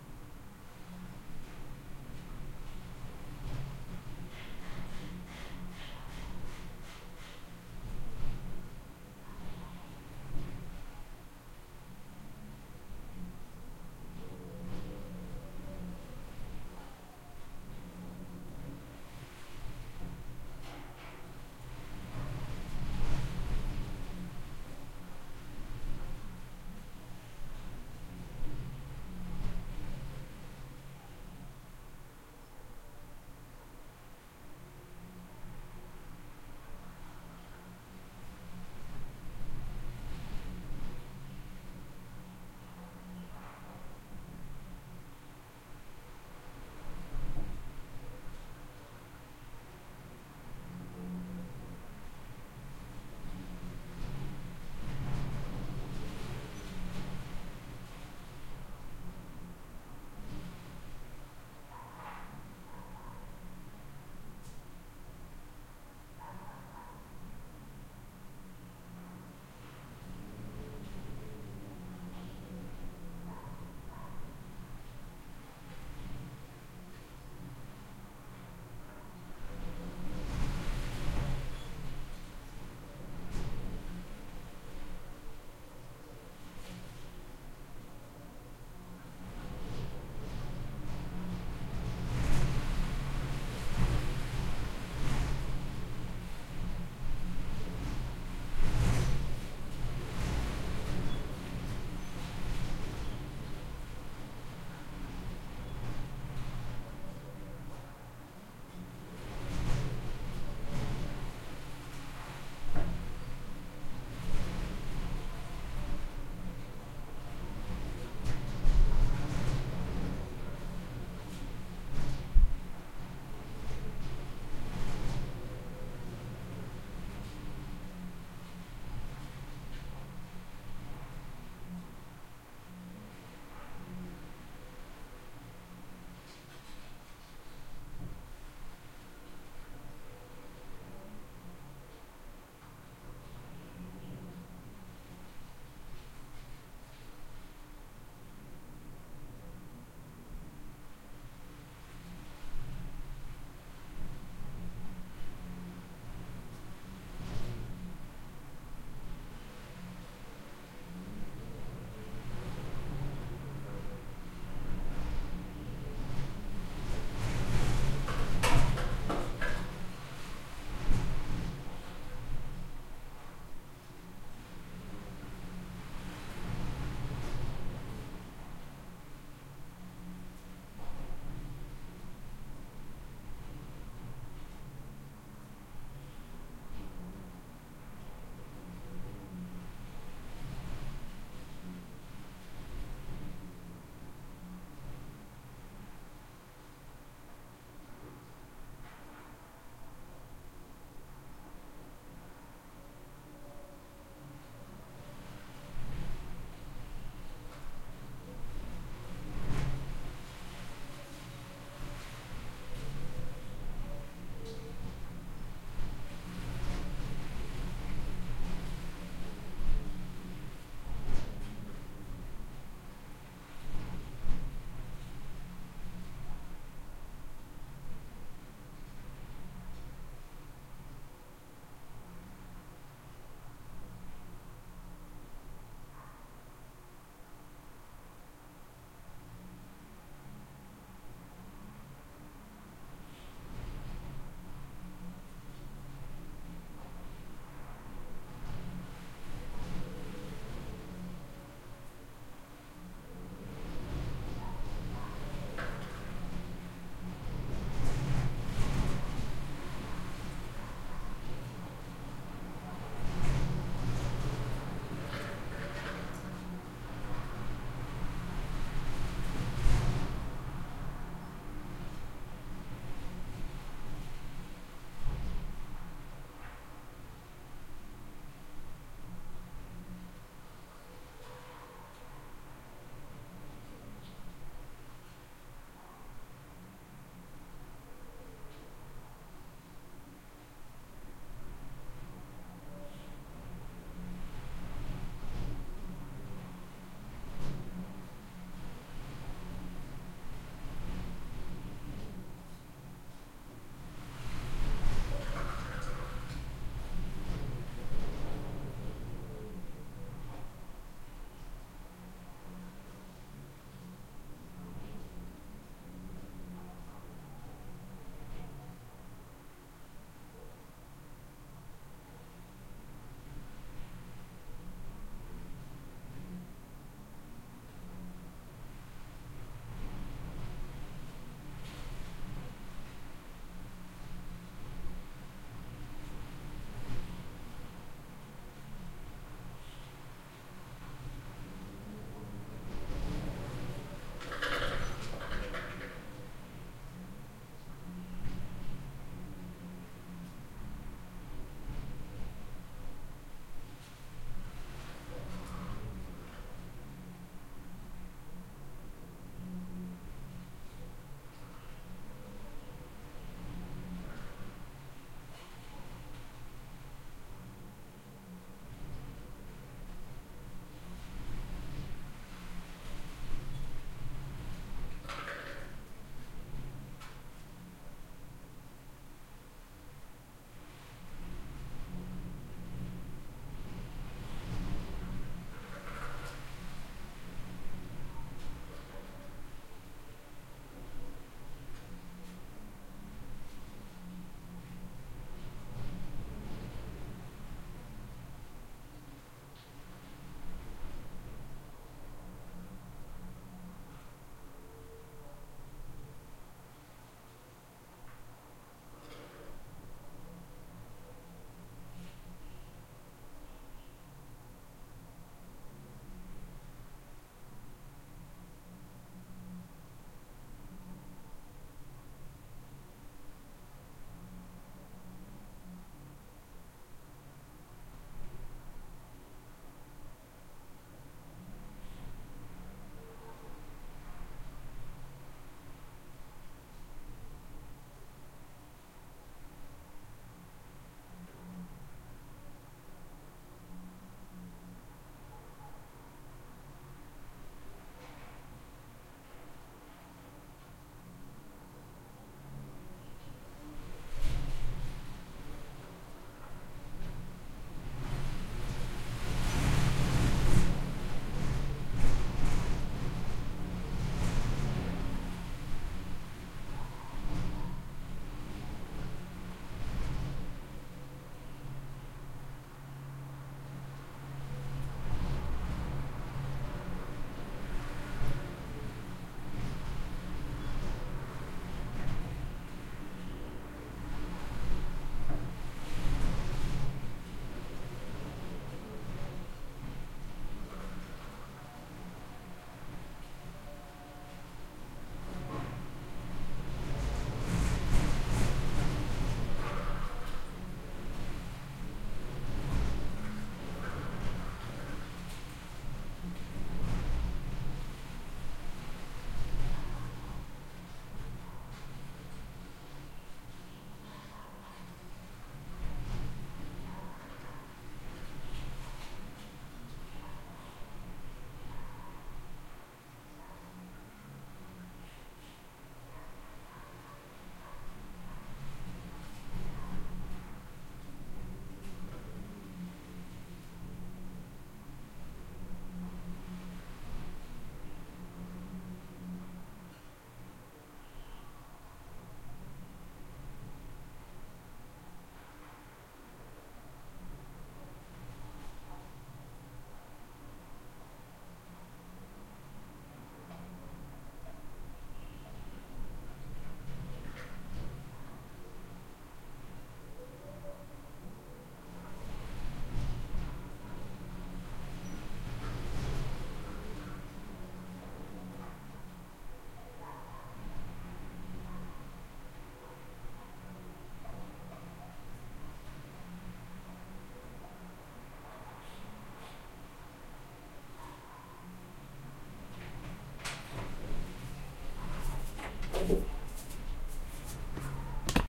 Wind Whistling Noises
Noise, indoor, Atmosphere, Ambiance, Wind, Whistling